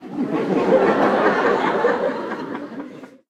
More Amusing
A stereo recording of audience laughter during a performance at a small venue. Zoom H2 front on-board mics.